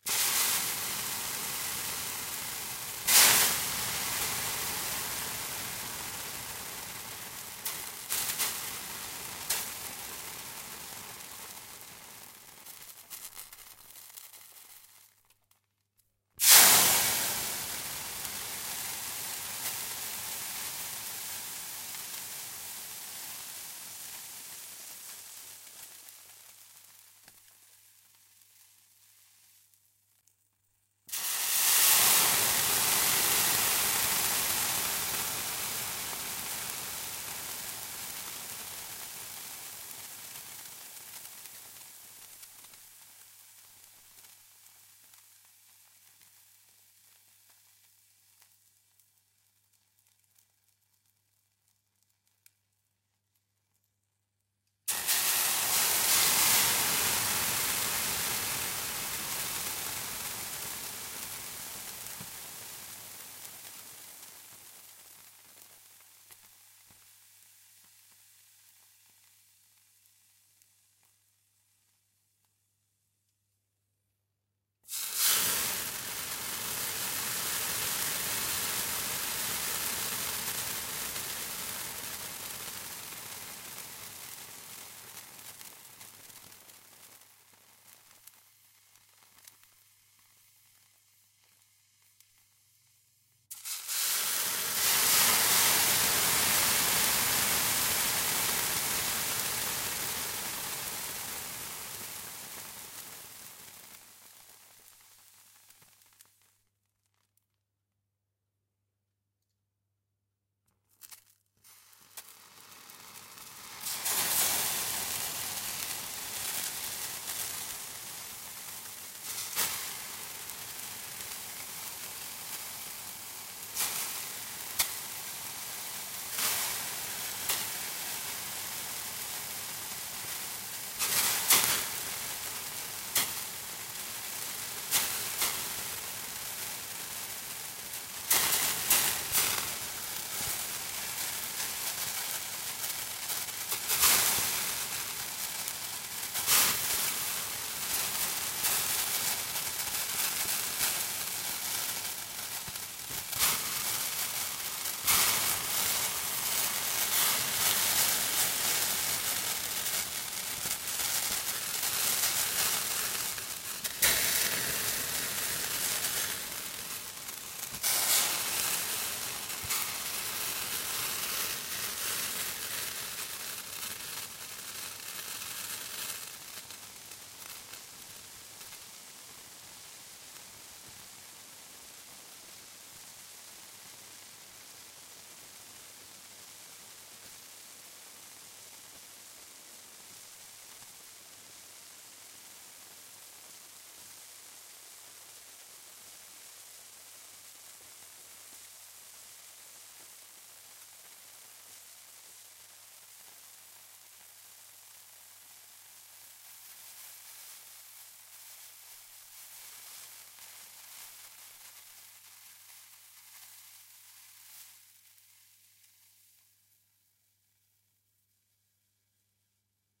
steam long
Recording of water poured on stove. Sustained steam. Recorded mono with a Neumann KM84.
stove, white-noise, sauna, noise, Steam